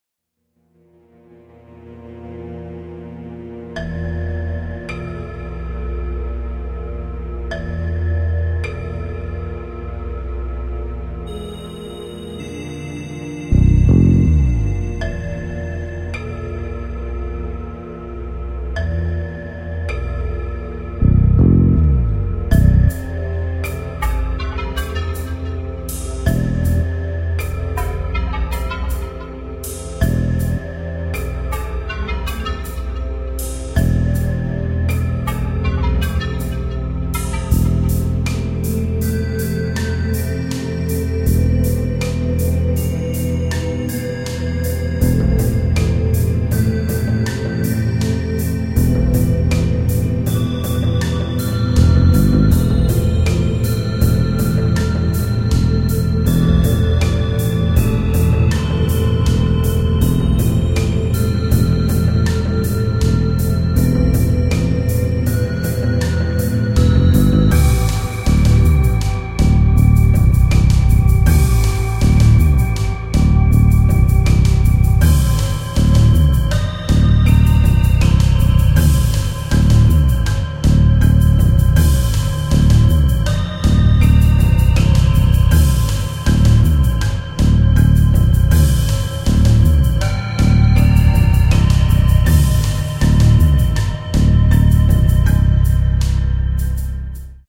Underground Ambient
An ambient underground adventure sounding eerie song for games, shows etc.
horror, game, tunnel, cartoon, enemy, rpg, drums, mystery, maze, synth, boss, ambient, battle, creepy, bass, spider, music, scary, underground, halloween, song, adventure, adventurous, ghost, monster, eerie, mysterious